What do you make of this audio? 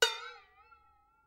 A little hit to a metalic water boiler. Funny
funny, hit, industrial, metal, metalic, percussion